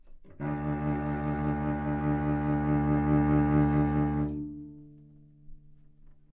Part of the Good-sounds dataset of monophonic instrumental sounds.
instrument::cello
note::D
octave::2
midi note::26
good-sounds-id::2101
Intentionally played as an example of bad-pitch-vibrato